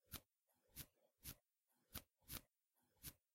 Sewing/stitching up some fabric
Well, the closest I could get, anyway. Technically, it's a banana. One sudden peel, truncated, modified and reversed in various ways until it sounded (to me) like it could vaguely represent the sound of sewing. ...Not that sewing by hand actually makes much of a sound.
seamstress, fabric, stitch, sew, stitching, sewing